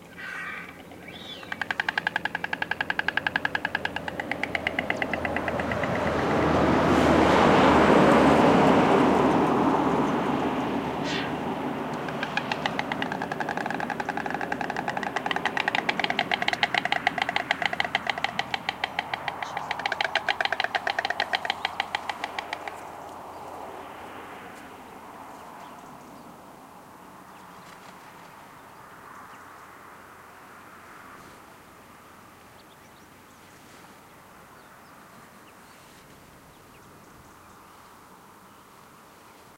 20061224.stork.nest.00
the approach of a car seems to stimulate a couple of White Storks in their nest (yes, they were at the nest in full winter) which start clapping their bills
clapping, south-spain, birds, nature, field-recording